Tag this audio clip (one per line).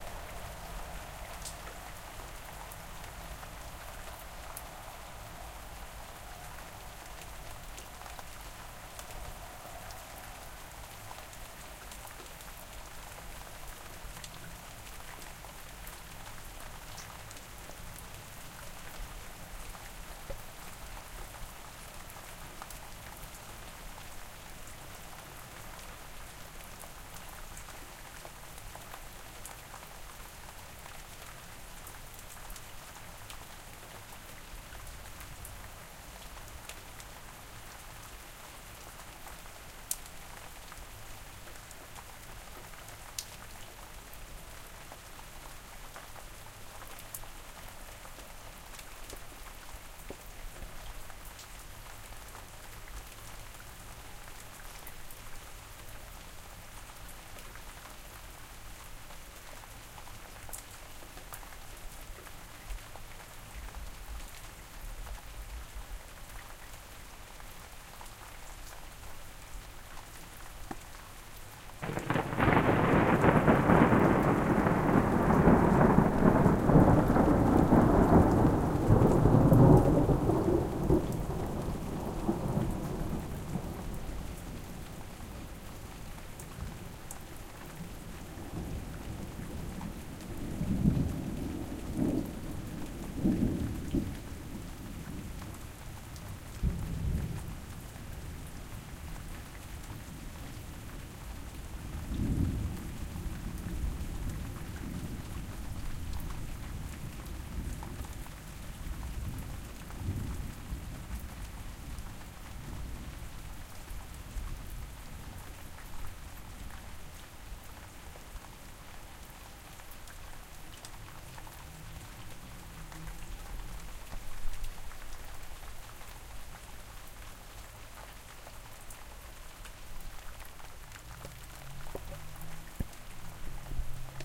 field-recording
lightning
nature
rain
storm
thunder
thunder-storm
thunderstorm
weather
wind